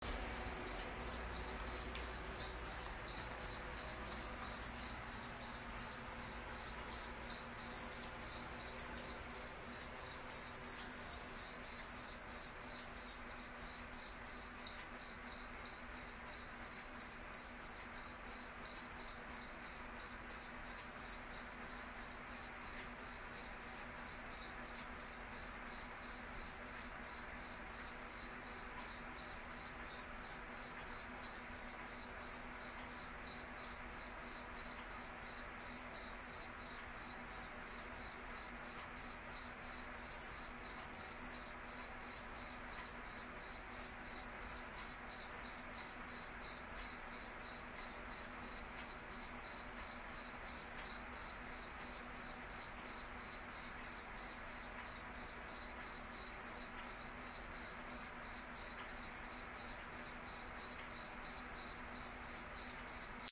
Cricket chirping
This recording was created using the iPhone 7 VoiceMemos app. The small dark brown cricket was loose in our home and making a lot of chirping noise at night. It sounds like a miniature car alarm. The 1 minute file is 546KB Enjoy.
chirp, insects, bugs, crickets, loud, summer, nature, insect, field-recording, evening, cricket, bug, night, chirping, noise